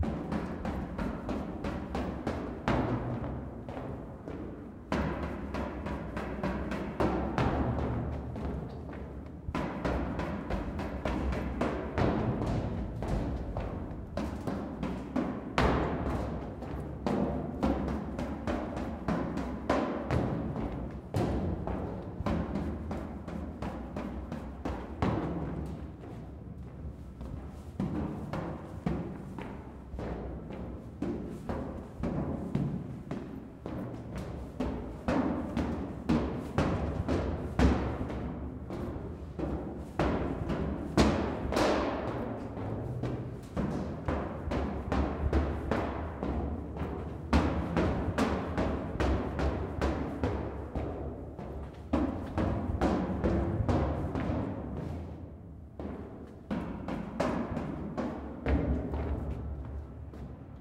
FOLEY Footsteps Metal 001
This is a nice clean recording of hard-rubber soled shoes running and walking up and down metal stairs in a concrete stairwell. It's reverberant, but clean. I hope it's useful to somebody.
Also, for anybody who's interested, it was actually recorded in the parking garage of Universal Studios in Hollywood, CA. I've geo-tagged the exact building.
Recorded with: Sanken CS-1e, Fostex FR2Le